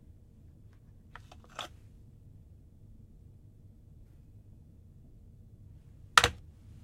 Picking up and Putting Down Object
This can be used for almost anything being picked up and put down on table.
down, Object, Picking, Putting, rotate, up